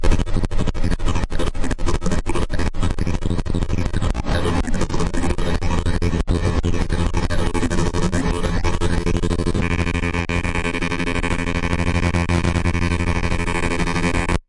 4 kinds of effects routed to create feedbacks and recorded.
abstract, digital, electro, electronic, glitch